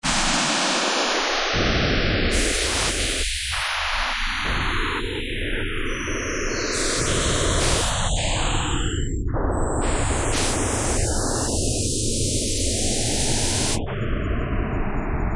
kugelblitz ambience
Yet another spectrogram-drawn sound. It could be associated with the sound that could be made while inside something as weird as a kugelblitz in space while in a movie or TV show.